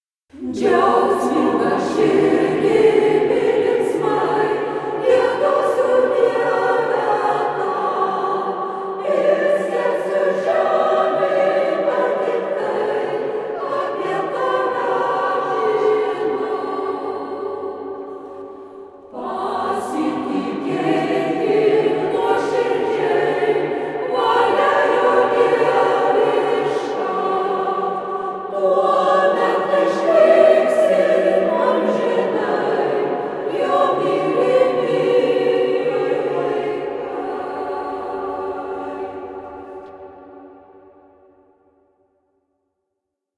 Church choir
A snippet of a Choir recording in a Reformal church. Vilnius, Lithuania.
Church, choir